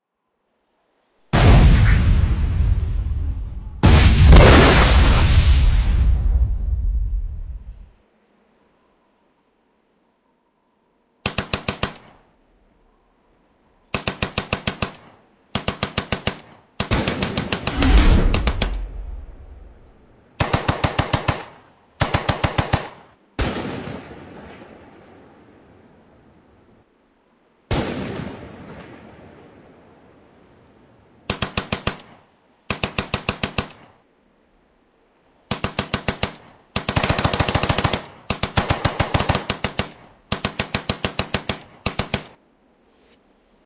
Real explosions Real gunshots
On board rode stereo mic of a camera, recording explosive ambush followed by ak47 shots and mortar bombs.
Real recording salvaged from a hidden camera on location of the ambush.
Recorded using Rode stereo mic.
ak47, army, artillery, bomb, boom, bullet, Explosion, fight, firing, grenade, gunfire, gunshots, kill, launch, launcher, live-fire, machine-gun, military, militia, missile, mortar, projectile, real, rocket, shoot, shooting, war, weapon